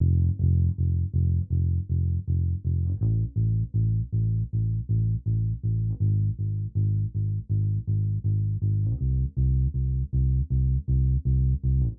Dark loops 015 bass wet 80 bpm
80, 80bpm, bass, bpm, dark, loop, loops, piano